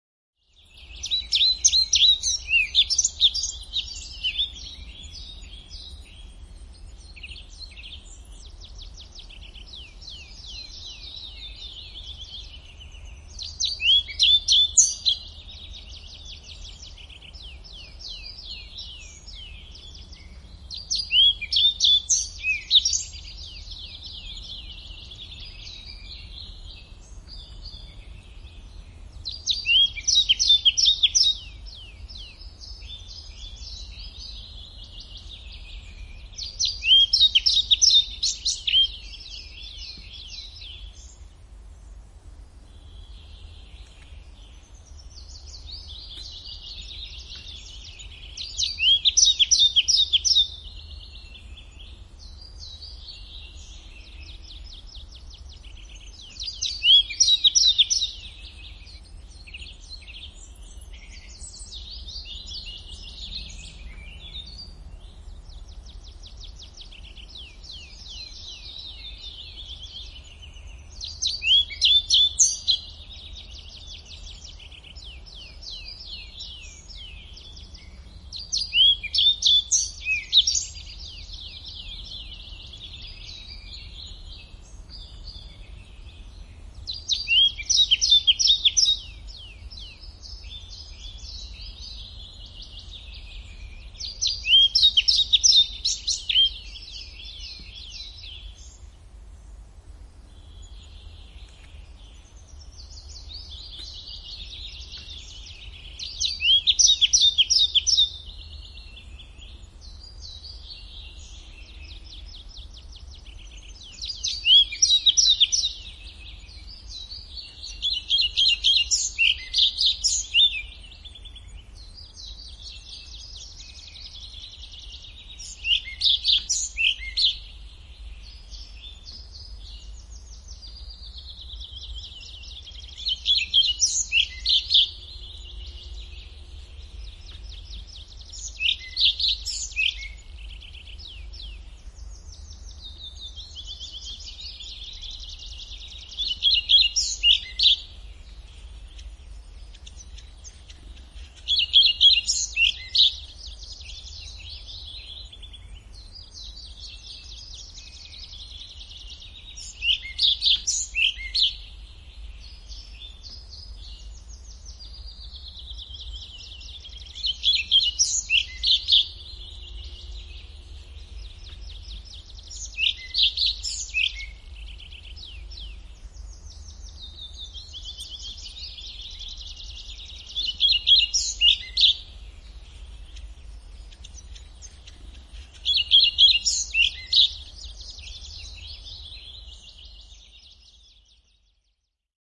Kirjosieppo, laulu / Pied flycatcher, song, singing in a tree in a forest, some other birds and distant traffic in the bg (Muscicapa hypoleuca)
Kirjosieppo laulaa puussa metsässä.Taustalla vähän muita lintuja ja kaukaista liikennettä. (Muscicapa hypoleuca).
Paikka/Place: Suomi / Finland / Lohja, Retlahti
Aika/Date: 09.05.2001
Birdsong, Suomi, Singing, Bird, Yleisradio, Linnut, Song, Kirjosieppo, Finnish-Broadcasting-Company, Lintu, Birds, Field-Recording, Yle, Linnunlaulu, Finland, Nature, Pied-flycatcher, Tehosteet, Luonto, Laulu, Soundfx